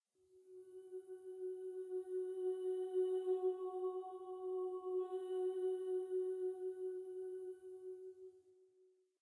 Spooky Celestial Sound
This is a two second segment of a singing voice which has been slowed down, with a couple of reverb and EQ effects added; an additional reversed copy made, then mixed together. It was used in a recent product of Ibsen's The Master Builder (Bygmester Solness).